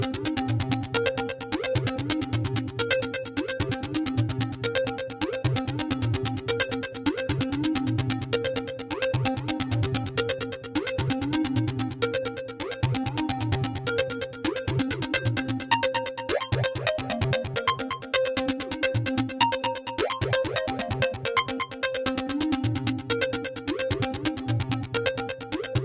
Someone Outside - Loop
A loop made in Native Instruments Kontakt. The library used is called Arpology by Sample Logic. BPM is 130 and I think the key is C.